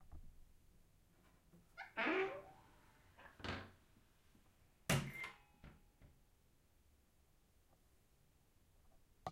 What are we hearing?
AAD Door Creak 4
Close, Closing, Creak, Creepy, Door, House, Old, Open, Squeak, Wood